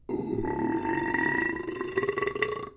A deep burp.